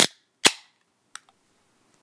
Load Up
cracking a soda can
recorded on my iPhone in FingerBeat
can, click, crack, pop, soda